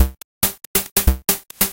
another short analog drum loop made with white noise
140 bpm